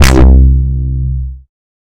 A very mean synth bass. Lots of growl and a nice round tone for songs that need a strong bass.